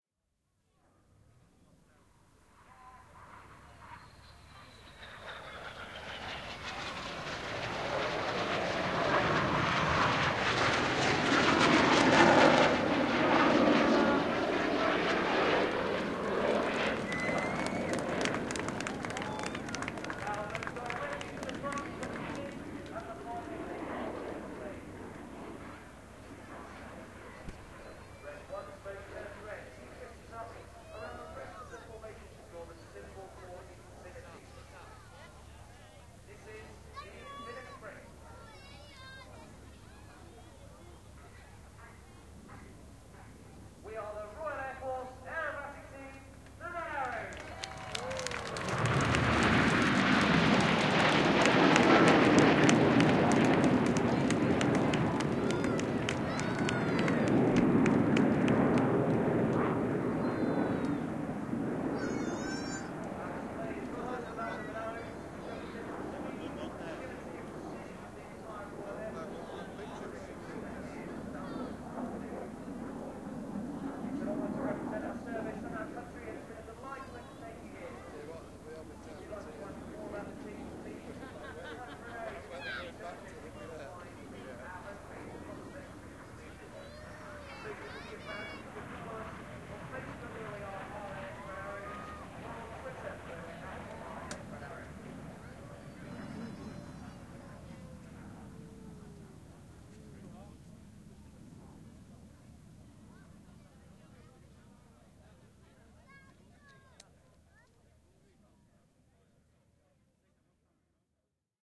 8 sept 2012, the red arrows departure at southport airshow
This is a recording of the Royal Air Force (RAF) aerobatic team, the red arrows as they depart Southport air show after their performance.
You can hear the commentary broadcast over the loudspeakers and the crowd cheering as the red arrows do their final manoeuvres.
Recording date: 2012/09/08
Recording location: Southport seafront, Merseyside, UK.
Recording equipment: 2X Shore SM58 dynamic cardioid microphones arranged in a near coincident pair, into the olympus dm670 digital recorder.
air-craft, air-show, Applause, crowd, departure, field-recording, jet, red-arrows, Southport